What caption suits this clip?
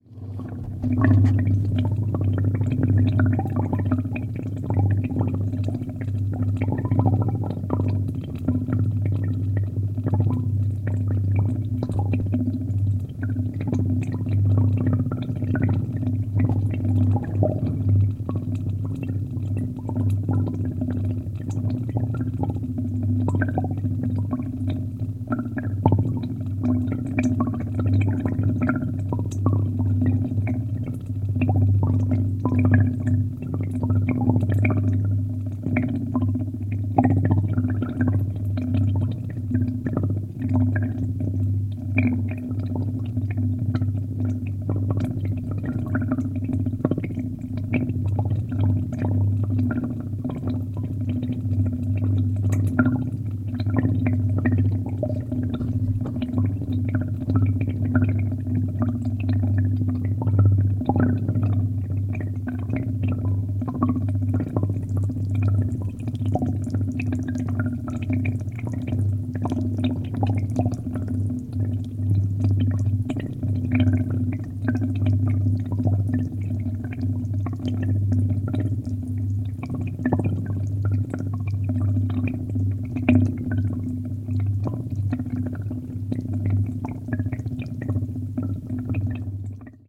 A mono field-recording of water gurgling in a large plastic drainage pipe. I turned a tap on before I got to the pipe, at 1m 05s the water arrives at the mouth. Rode NTG-2 inside the pipe > FEL battery pre amp > Zoom H2 line-in.
field-recording, gurgle, pipe, water, mono, gurgles